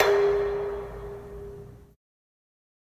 mb knuckle-04
Made from different sounds recorded from my metal thermos (24 oz) bottle by striking it with my hand. Recorded on iPhone
clank, ding, copper, ting, tin, pail, hit, percussion, strike, impact, steel, metallic, metal, sound, rhythm, iron, pot, pang, dispose, pan, percussive, foley, bottle, clang